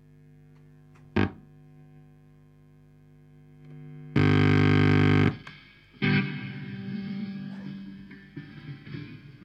Setting-up
Strange hums and a random short jab at a chord as I set my guitar up. Background laughter. This sample was generated with a Gibson SG and a VOX AC-30 amplifier. It was recorded using two microphones (a Shure SM-58 and an AKG), one positioned directly in front of the left speaker and the other in front of the right. A substantial amount of bleed was inevitable!
guitar, plugging-in